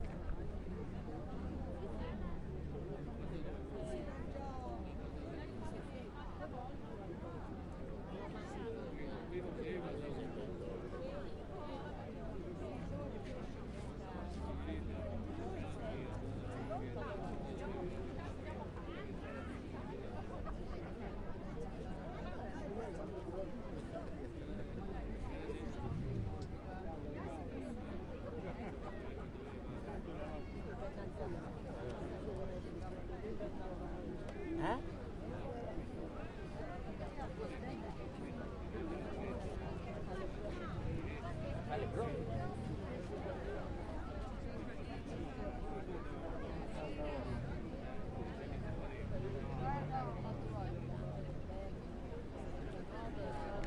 voices of many people chattering during a festival, event by the sea in Livorno
background, chat, chattering, crowd, soundscape, voices